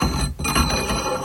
Drawing Metal Pole Through Metal Hole 4
Scraping a heavy iron rod (handle) against and through iron vice.
Noise reduction and corrective eq performed.